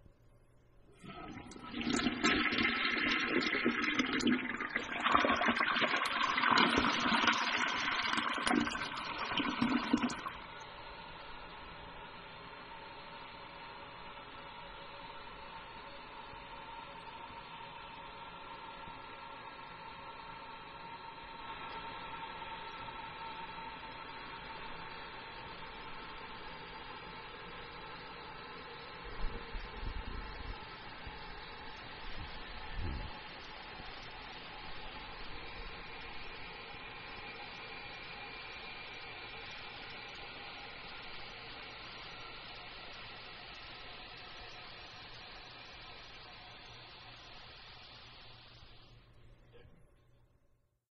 Toilet Flush Tank Fill
Toilet flushing tank filling to shut-off
flush, noise, toilet, water